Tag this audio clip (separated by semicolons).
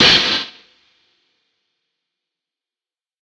industrial; beat; drum-hit; short; processed